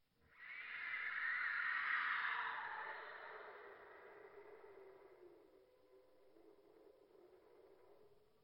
Whispy Shriek
Wailing in a dungeon. Also a whispy 'shh' I slowed down to good effect!